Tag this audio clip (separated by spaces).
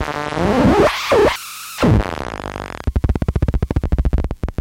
noise lofi atari